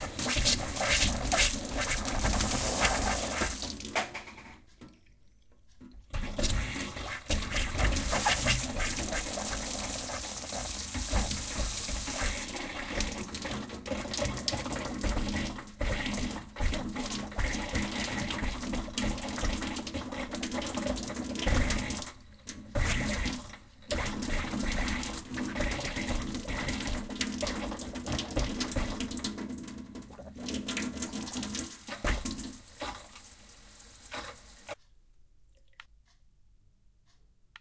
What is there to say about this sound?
water faucet is coughing
the sound of air coming out of the faucet.
Used recorder Taskam DR-05.
The record was not edited in soft.
tap, faucet, pipe, water, coughing